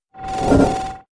shield respawn
video game sounds games
games, game, sounds